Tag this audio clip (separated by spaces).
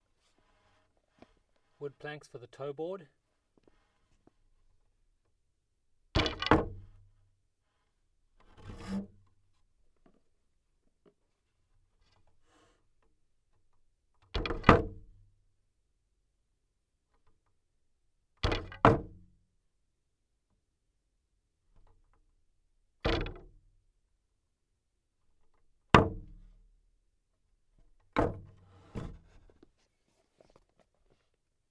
board hit